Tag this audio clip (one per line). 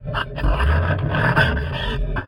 sound
fx
wire
contact
sfx
microphone
metal
effect
close
steel
soundeffect